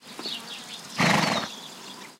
20170507 horse.snort
Short horse snort, bird chirpings in background. Primo EM172 capsules inside widscreens, FEL Microphone Amplifier BMA2, PCM-M10 recorder. Recorded near Bodonal de la Sierra (Badajoz province, Spain)
animal, barn, farm, field-recording, horse, snort, stable